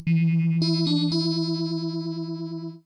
Mystery Jingle
A murder mystery/crime show jingle (New chapter, act introduction...)
act, broadcoast, cinematic, crime, jingle, movie, murder, mystery, old, opera, radio, sequence, soap, tune